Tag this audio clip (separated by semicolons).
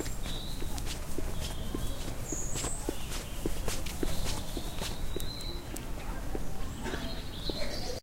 UK; sonic; heidi; hetty